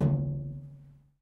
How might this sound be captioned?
Metal container hit medium
Hitting a metal container